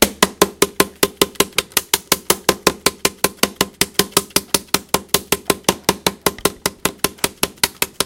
Mysounds HCP Jules Bottle
This is one of the sounds producted by our class with objects of everyday life.
Theciyrings, France, Pac, Mysounds